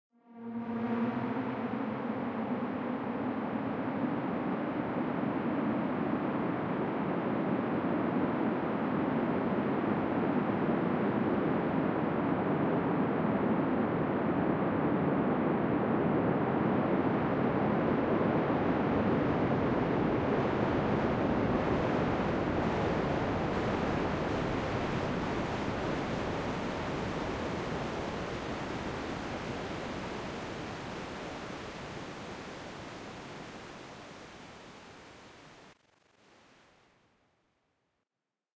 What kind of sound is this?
Long Noisy Pitched Woosh